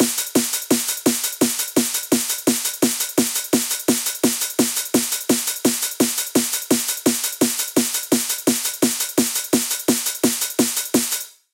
Rhythm 4c 170BPM
Without kick drum. Hardcore 4 x 4 rhythm for use in most bouncy hardcore dance music styles such as UK Hardcore and Happy Hardcore